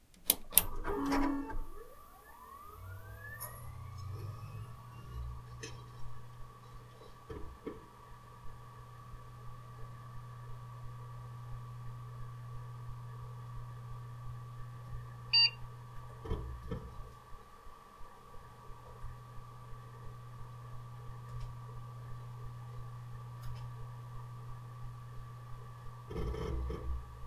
Computer einschalten ohne Rauschen
Switching on a computer.
Boot, Startup